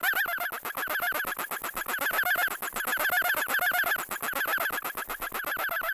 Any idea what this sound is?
I made this sound in a freeware VSTI(called fauna), and applied a little reverb.